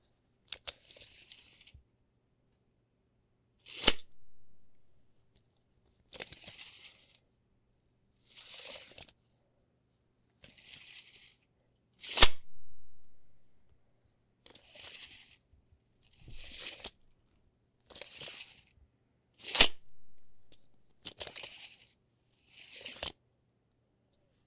Medium tape measure drawn out and retracted. Two versions for retraction, slowly and letting go.
tool, measure